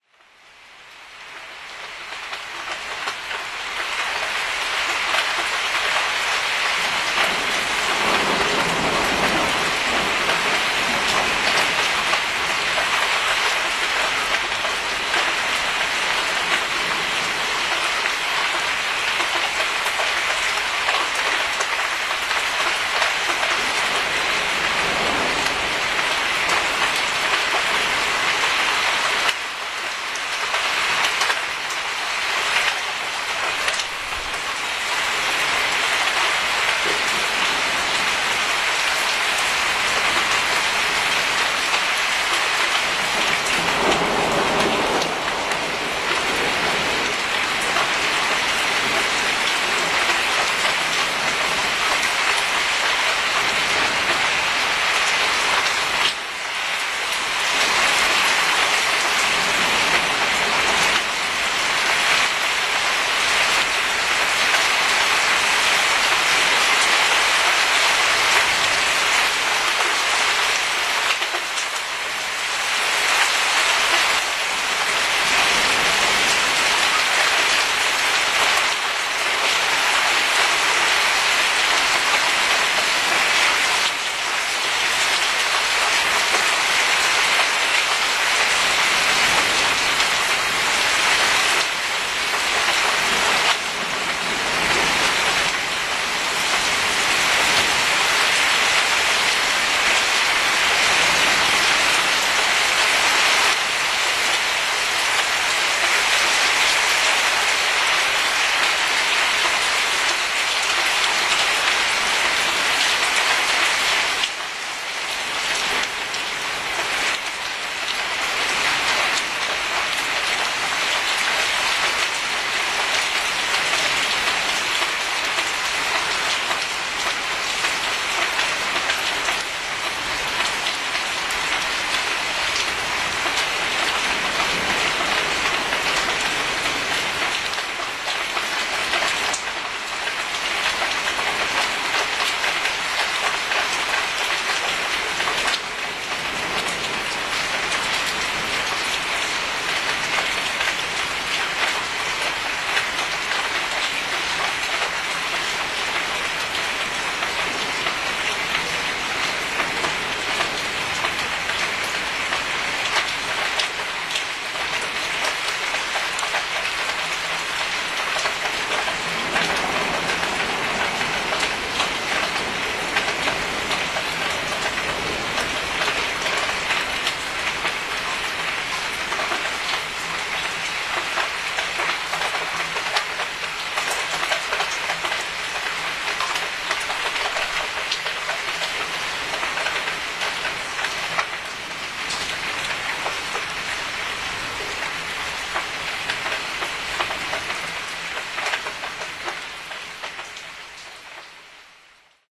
thunderstormy balcony070610
07.06.2010: about 15.00. the thunderstorm was coming. the rain and strong wind. recorded from my balcony (Poznan, Górna Wilda street). the bells sound and noise made by the clothes airer and ladder standing on my balcony.
more on: